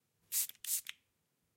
nasal-spray-single-stereo
Two short sprays from a nasal spray (ZOOM H6)
spraying, medicine, spray, nasal